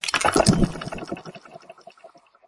fagot reed
fagot processed sample
fagor, reed